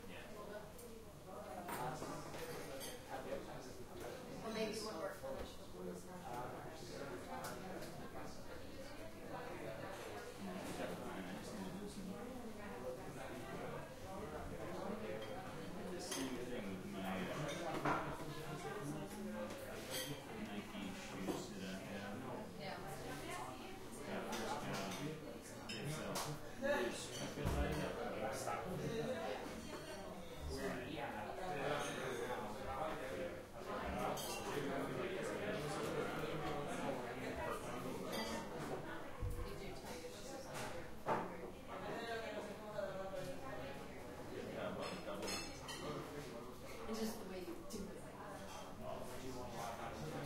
Barcelona restaurant ambience small bar
ambience, restaurant, street